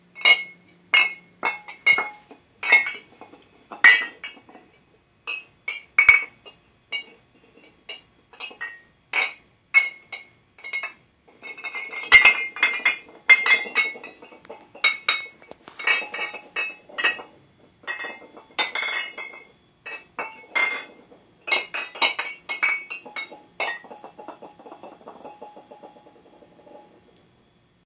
Wine bottles clinking on a concrete floor
Many wine bottles clinking on a concrete storage room floor